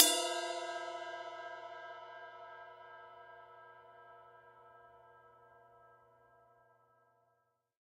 ride bellv2 3
X-Act heavy metal drum kit. Zildjian Avedis Rock Ride 20". Recorded in studio with a Audio Technica AT3040 condenser microphone plugged into a Behringer Ultragain PRO preamp, and into a Roland VS-2400CD recorder. I recommend using Native Instruments Battery to launch the samples. Each of the Battery's cells can accept stacked multi-samples, and the kit can be played through an electronic drum kit through MIDI.
ride, drum, heavy, kit, zildjian, avedis, rock, metal